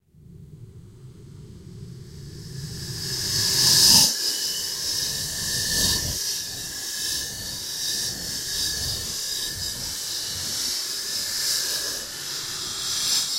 bbwhsc01ShfRvUSIMpour01ShfRv
Large metal bowl scraped with whisk. Granulated, reversed, mutated into a pouring water sound that is also reversed and granulated.